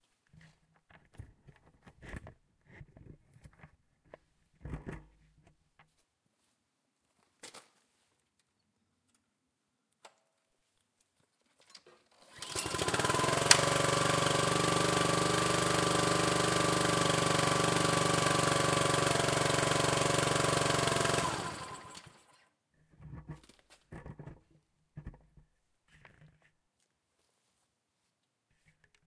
pull-start-shutoff
Small honda motor starts idles shortly, shuts down, Tascam DR-40